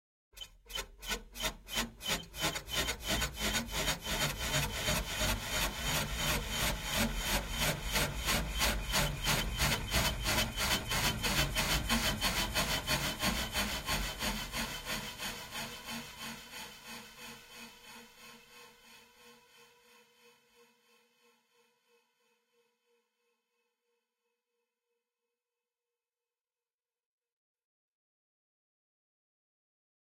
Wheel/Rueda [Risers] (G4)
Es un raiser que va sumando delays y que tiene un tono caracteristico en frecuencias altas que da la sensación de provenir de una rueda como de bicicleta
Raiser that adds some delays and have a high frequency caracteristic tone wich give the impression of being a wheel